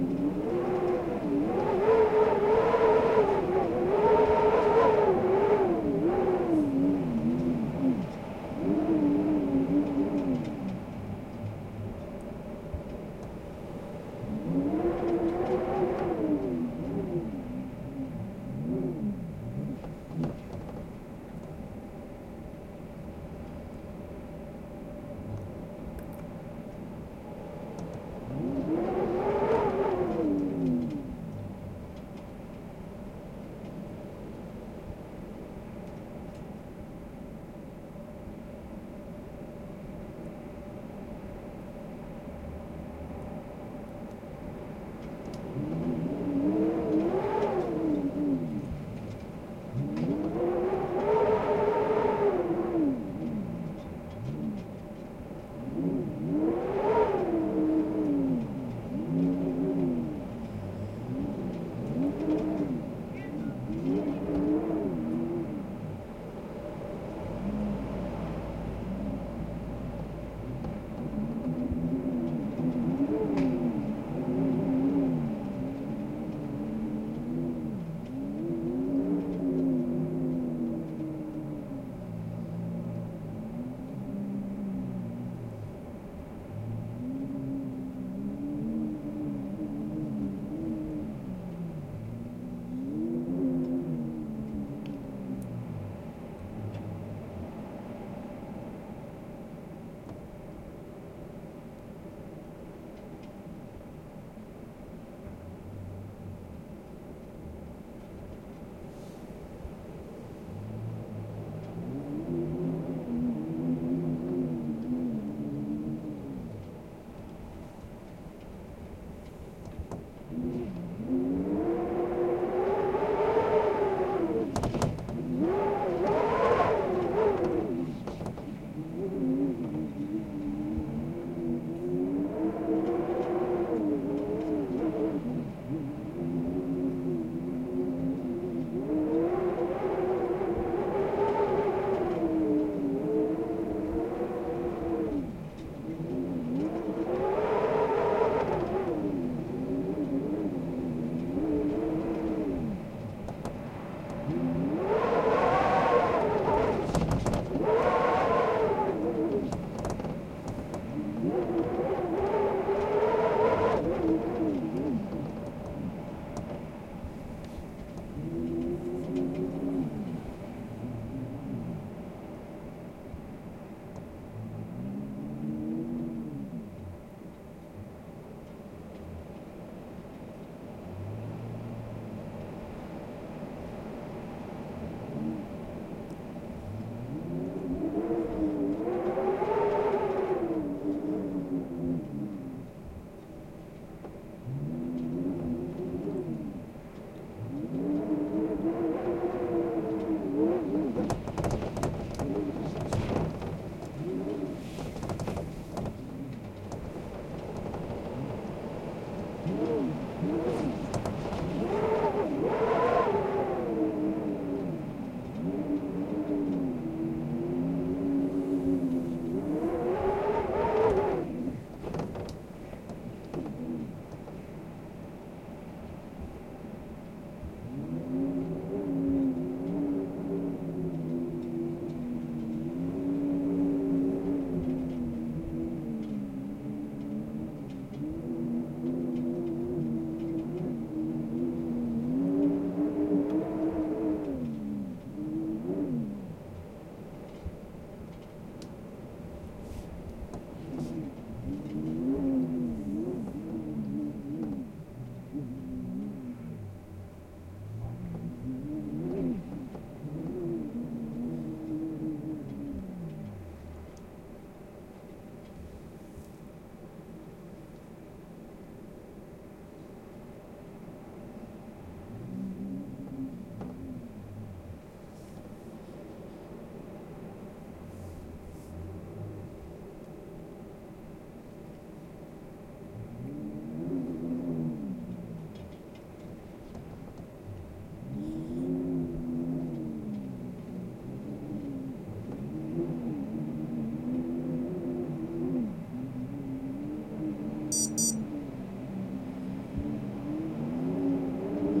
Windy Window
We were having a major blizzard here in Cape Breton on March 5, 2016. I was lying in bed at my studio and the wind was howling against the window. As I listened to that I said to myself, "Wow, my window makes a really cool wind howling sound; that'd make a great storm sound effect."
A moment later, when I realized what I'd just said, I was scrambling for my phone and microphone... ;)
90° Stereo Width
blizzard, blow, blowing, howl, howling, wind, window, windy